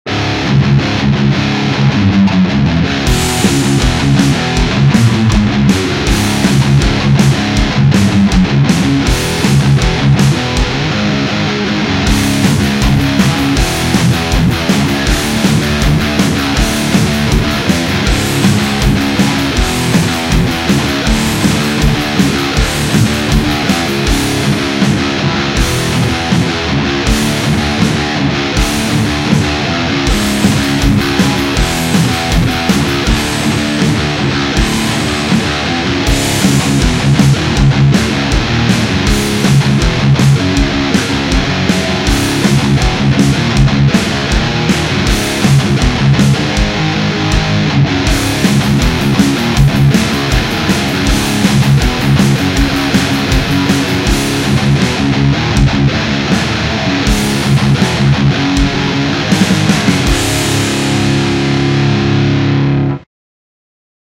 Heavy Metal Riffs - 3HR.MT3
band, bass-guitar, distorted-guitar, distortion, drum-kit, drums, electric-guitar, groove, guitar, guitar-riff, hard-rock, heavy-guitar, heavy-metal, instrumental, intro, lead-guitar, metal-guitar, metal-riff, music, power-chords, rhythm, rhythm-guitar, riff, riffs, rock, rock-guitar, solo-guitar, soundtrack, thrash-metal, trailer